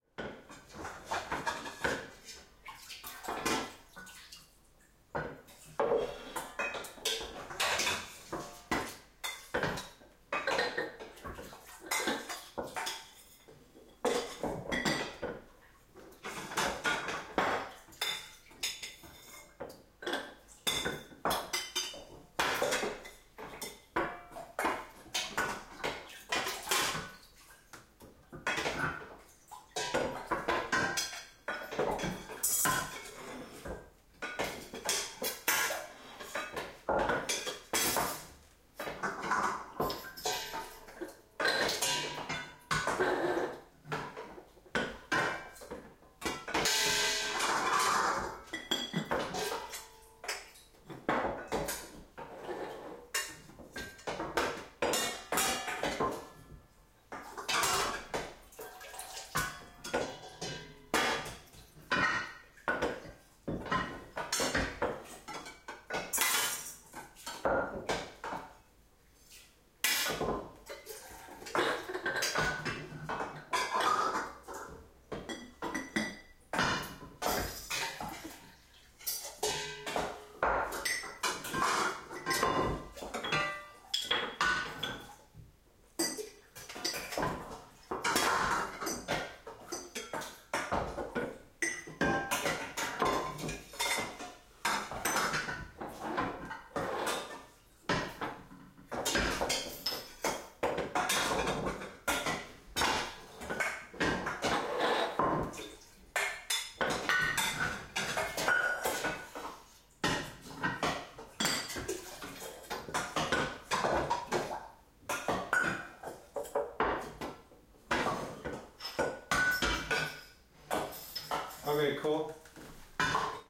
ambience foley kitchen
foley, kitchen, ambience